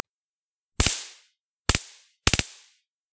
Taser/High Voltage discharge
after recording a video (high voltage sparks in chlorine gas) i decided to upload these sweet sounds here
Oh and the video FAILED, on color change occurred
electricity, taser, spark, ozone, shock, plasma, arc, electric, zap, high-voltage